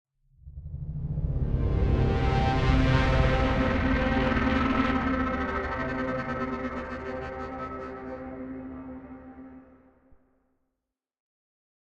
Braaam Absynth
Synthetic cinematic braaam
Braaam, Cinematic, Synth